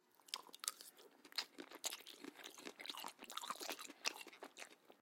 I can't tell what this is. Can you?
Zombie Bite
beef, bite, biting, chew, chewing, crunch, dead, delicious, zombie